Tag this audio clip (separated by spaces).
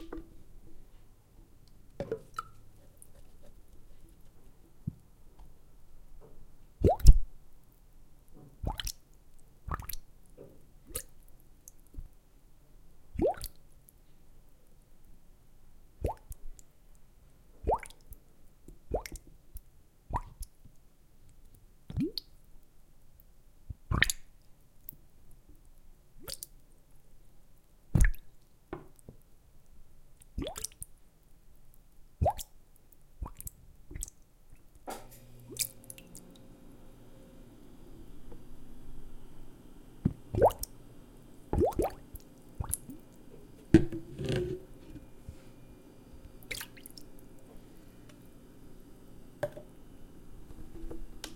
blub water